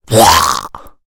A low pitched guttural voice sound to be used in horror games, and of course zombie shooters. Useful for a making the army of the undead really scary.
arcade, Evil, game, gamedev, gamedeveloping, games, gaming, Ghoul, Growl, horror, indiedev, indiegamedev, Lich, Monster, sfx, Speak, Talk, Undead, videogame, videogames, Vocal, Voice, Voices, Zombie